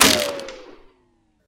Ricochet wood
snap, ting, wood, bang, metal, crack, pow, gun, ping, ricochet, shoot